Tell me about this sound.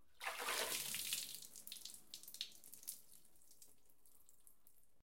Lifting Out of Water
Simulation of pulling a head out of water.
out, water, head, splash